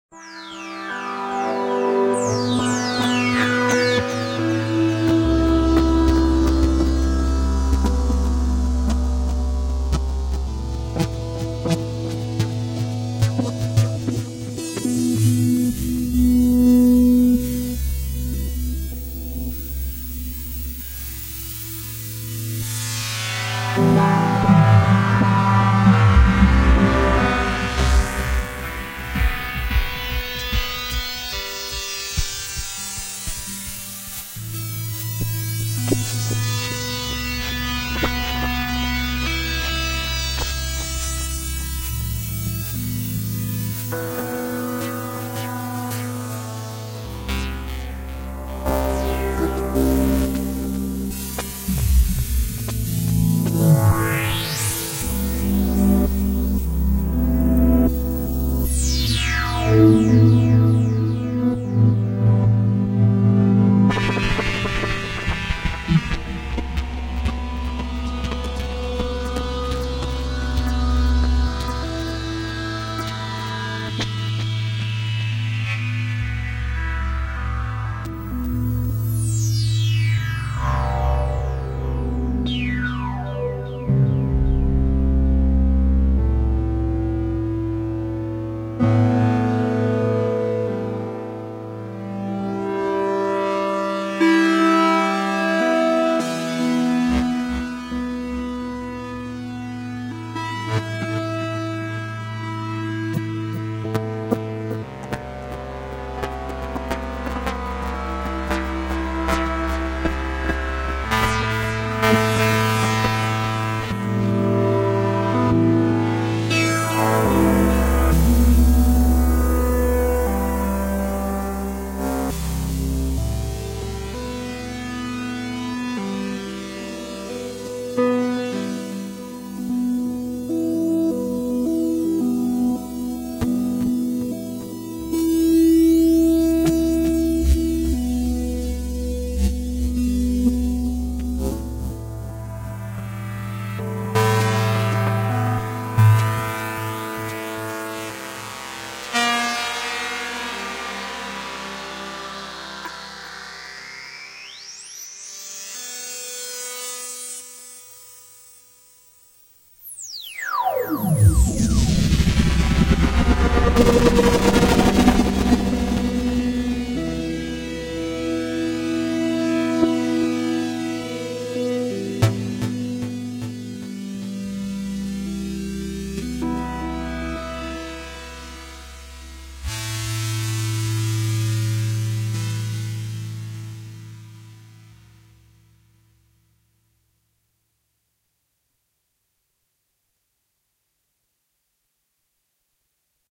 This is an impression of earth. It is created with the Clavia Nord Micro Modular and processed with a Boss SE-50. 'Earth' contains mainly harmonic elements which represent the repetitive aspects of the earth, like days, years and the place is has in the solar system. 'Earth' is affected by water, wind and fire.
ambient, elements, impression, soundscape, synthesizer, competition, earth